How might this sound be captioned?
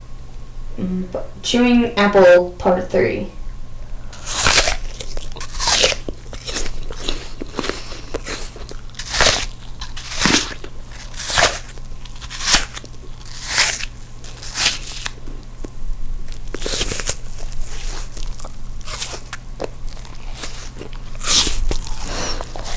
chewing apple
Chewing an apple.
chewing, apple, fruit, eat, bite, crunch, eating